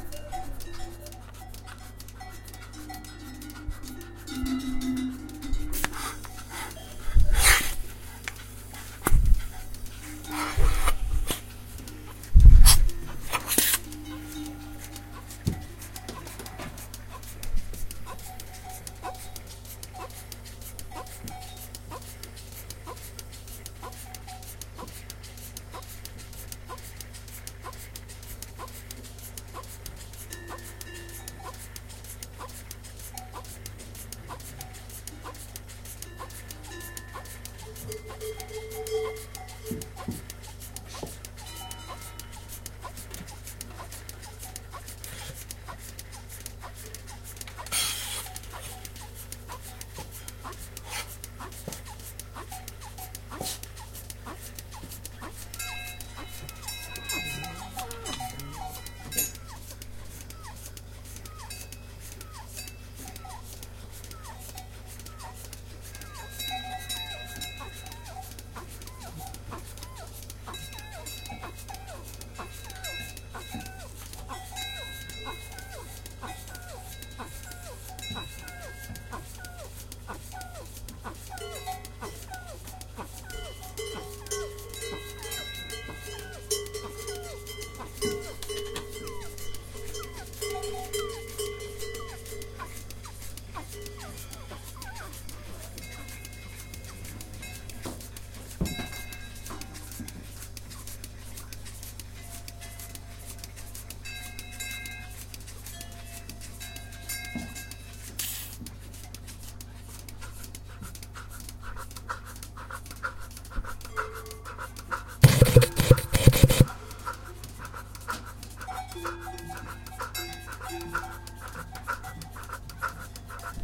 zAlp 14 melken 2
sound of milking a cow in a barn in switzerland on an alp, recorded with a Zoom H4n in 2014
mechanicalmilker, fffkkkttt, cowmilking, melkmaschine, milkingmachine, alp